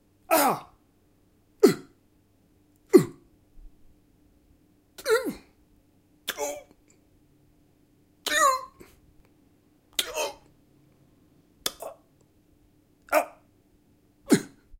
Male voice wincing in pain with variations of 'oof' as if just punched or hit